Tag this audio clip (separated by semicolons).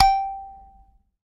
sanza; percussion